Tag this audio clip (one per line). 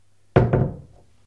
close
closing
door
doors
open
opening